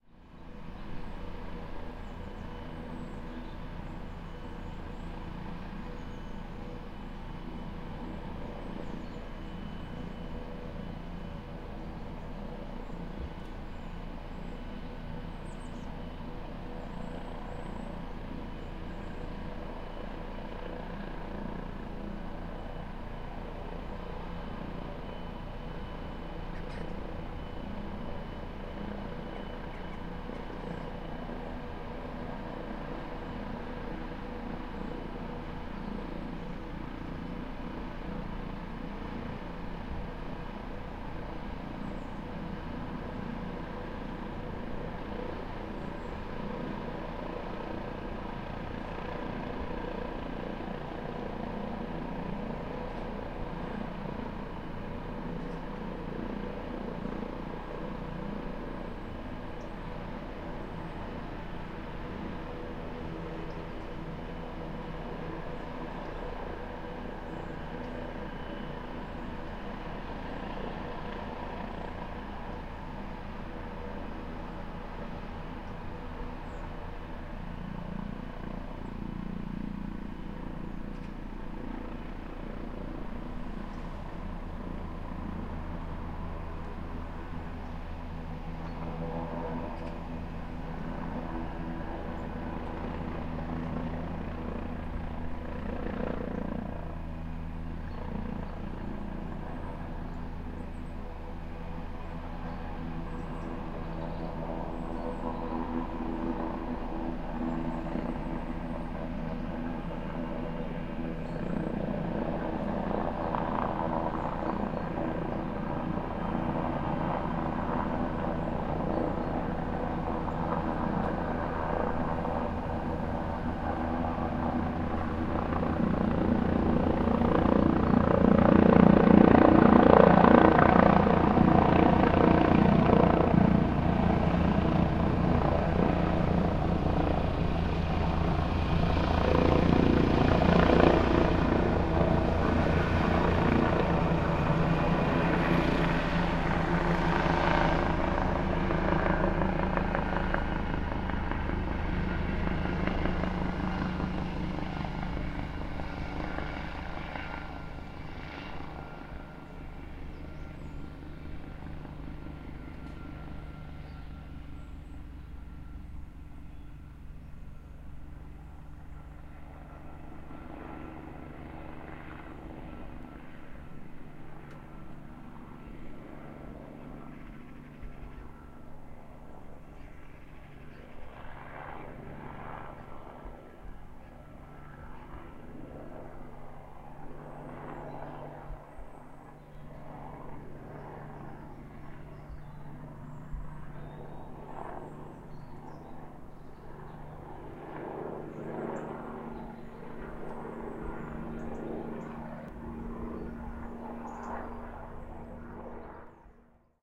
Helicopter Flyby, Distant, A
Raw audio of a helicopter taking off from a nearby field hidden behind some trees, hovering for a moment above the trees (with the sound no longer muffled), before flying away from the recorder. The helicopter was roughly 100 meters away.
An example of how you might credit is by putting this in the description/credits:
The sound was recorded using a "H1 Zoom recorder" on 8th October 2017.
distant, flyby, helicopter